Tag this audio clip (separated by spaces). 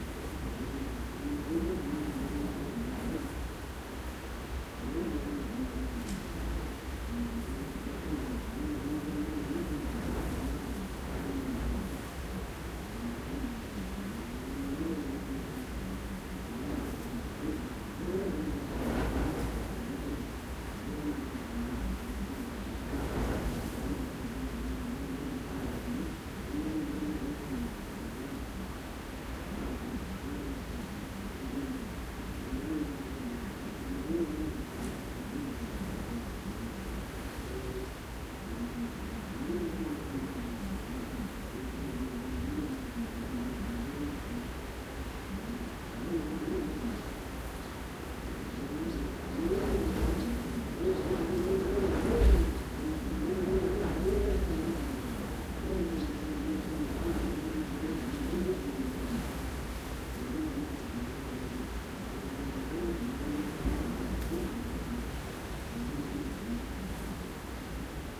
breeze field-recording wind window